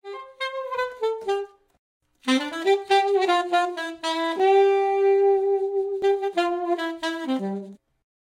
Cuban Style Saxophone Loop
A few samples of a saxophone from Logic Pro X I made into one loop. Good for jazz or lo-fi.